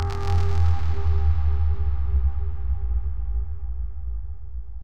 Obscure SFX - Hit #1
Hit, Sine, Poatao, SFX, Bass, 1, Obscure